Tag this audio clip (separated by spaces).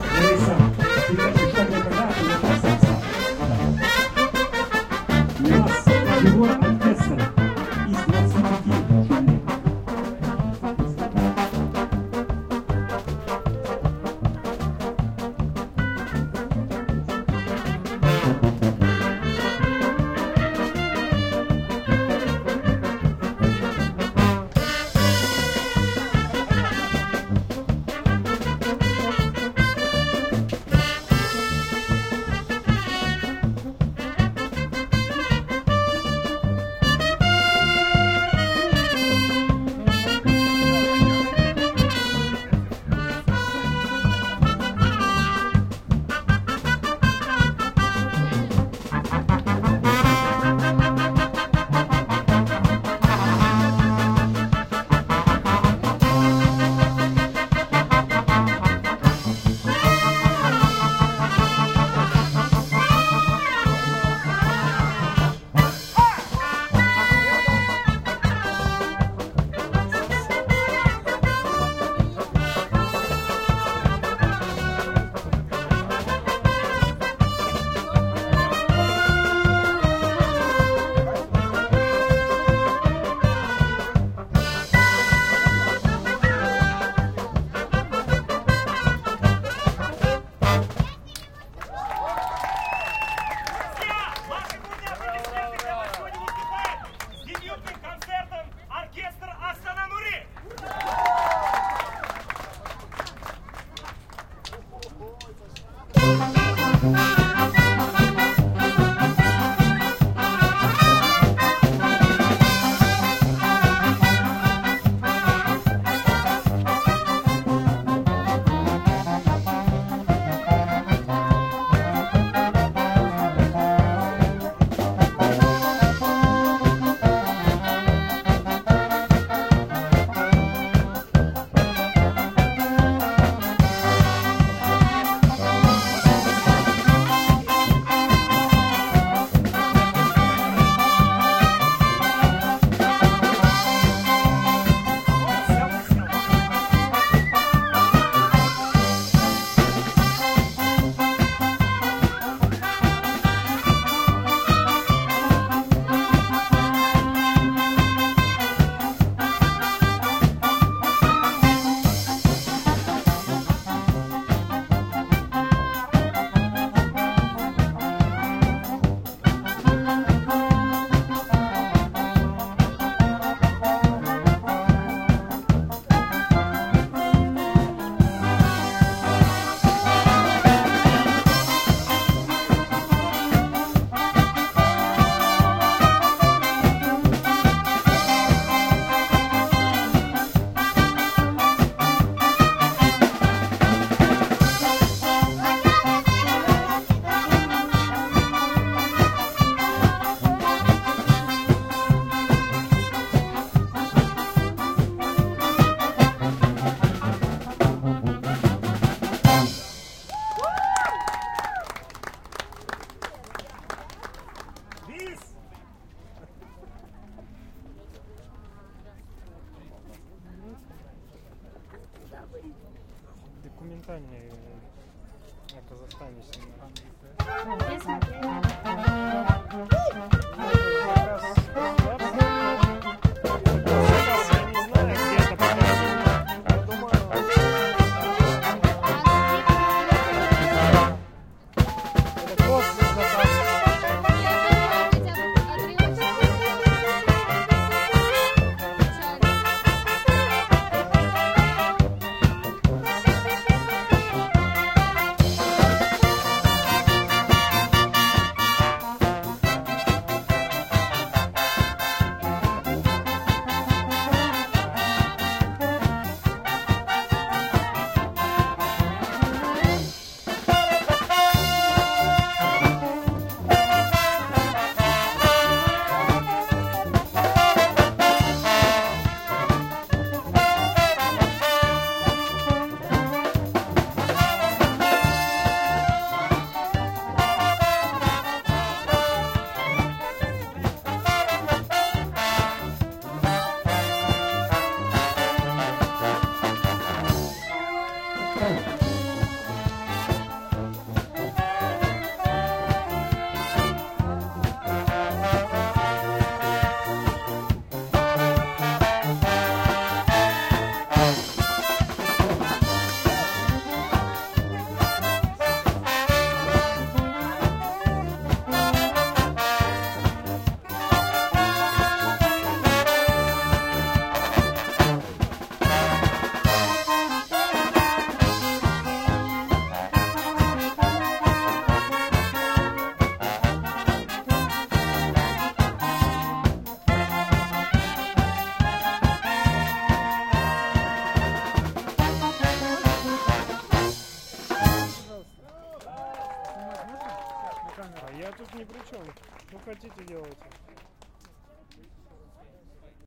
Astana brass Kazakhstan musicians people Russian street street-music street-musician